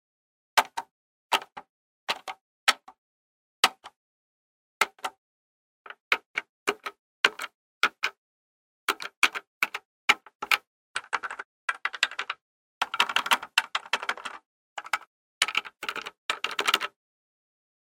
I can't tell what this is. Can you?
Long Version all the buttons put together!
Entire recording of pressing buttons plastic ones in a studio atmosphere with a Zoom H6.
button, button-click, buttons, click, game, plastic, press, push, sfx, sound, switch